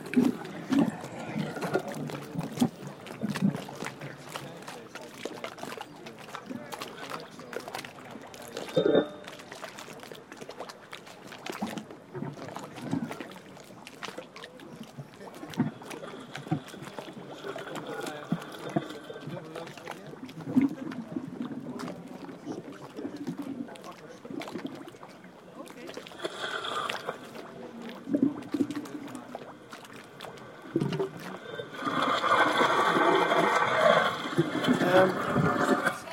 'Lost Devices' Water Themed Audio Artwork in Dutch Countryside
Mono recording of an art installation consisting of speakers laying in the water in Roelofarendsveen, a village in the Dutch countryside. You can hear the watery computer-manipulated sounds from the speakers, as well as the real nature sounds of the area. Recorded in the summer of 2011 with my iPhone 4 (Blue FiRe app).